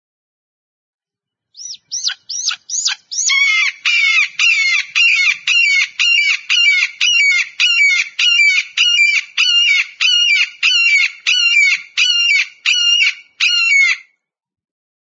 This is the sound of a mature
Red-shouldered Hawk - Buteo lineatus
recorded in Bandon, Oregon in July09.
Bandon, Hawk, Oregon, Red-shouldered